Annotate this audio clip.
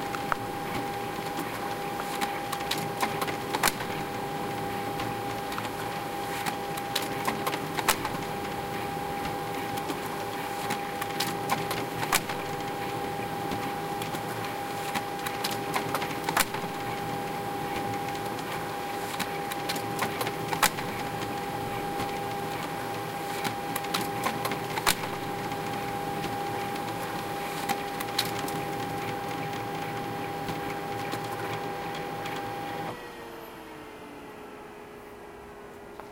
unrelenting samsung laser printer successfully printing several pages without clogging.
Edirol R-1